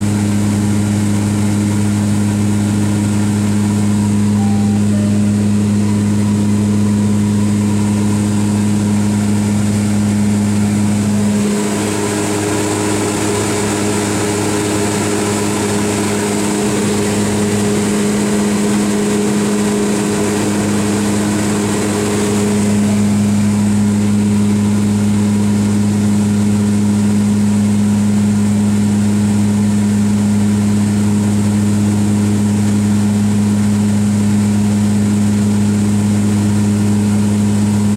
Recorded inside a propellor plane when we were still ascending (and flew through clouds) Sound is slightly different than ambience 2